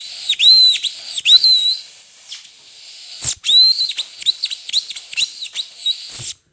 Insulation Board Scraping Against Glass Various
Smash, Friction, Hit, Boom, Impact, Bang, Tool, Crash, Plastic, Steel, Tools, Metal